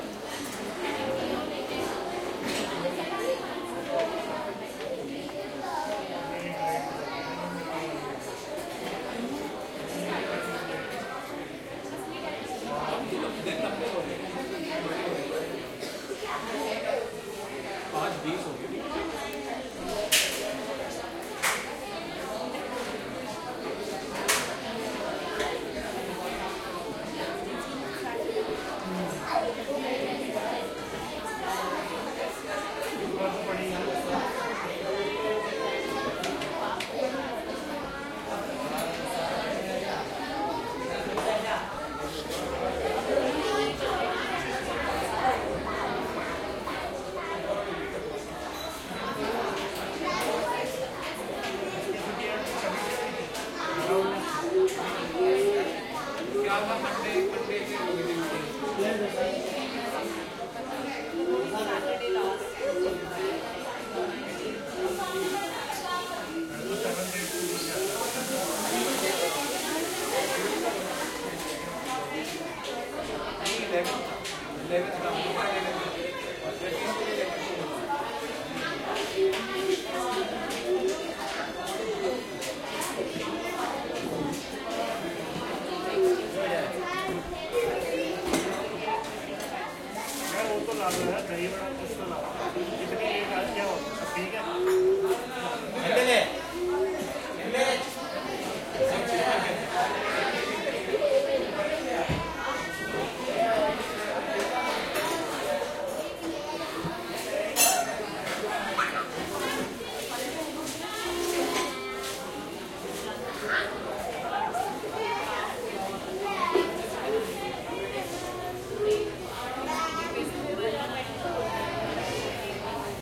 -05 SE 4CH ATMO DELHI busy south indian restaurant
Atmosphere from a busy south Indian restaurant, probably somewhere near Connaught Place.
Recorded with ZoomH2n